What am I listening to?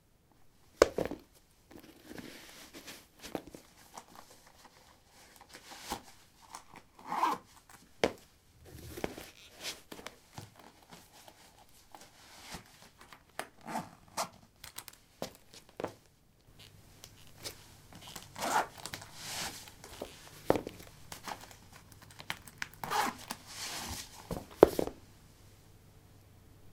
lino 17d boots onoff
Putting boots on/off on linoleum. Recorded with a ZOOM H2 in a basement of a house, normalized with Audacity.